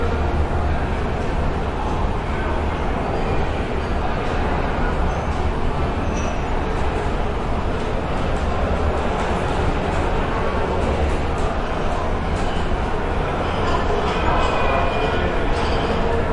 Ambient People Noise Large
children, Shopping-mall, ambience, ambient, field-recording, atmosphere, people, noise